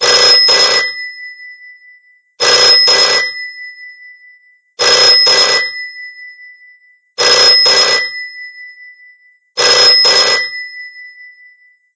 Edited in Audacity to be fully loopable. The different versions of this sound are of varying lengths.
706, 80341, bell, bt, hyderpotter, phone, ring, ringing, ringtone, telephone